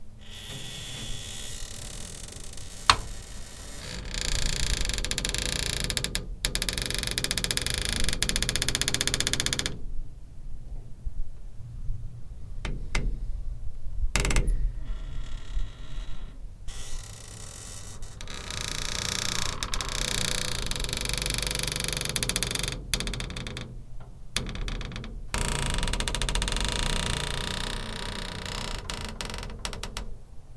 Close-mic of a squeaky glass door. This version is mostly slow creaks.
Earthworks TC25 > Marantz PMD661